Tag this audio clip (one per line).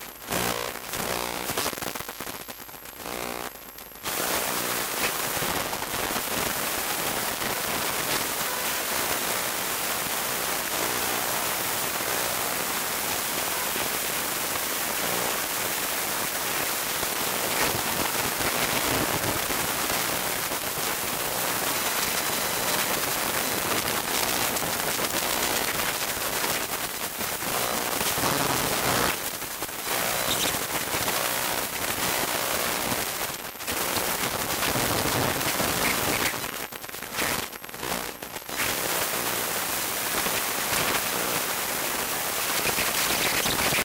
glitches
static
electromagnetic
EMF
glitch
soundscape
hum
electromagnetic-pickup
iphone
weird
machine
ambience
electromagnetic-field
field-recording
drone
noise
experimental
smartphone
electronic
radio
effect
computer
ambient
strange
fx
electro-pickup
buzz
interference
electromagnetic-frequencies
electrical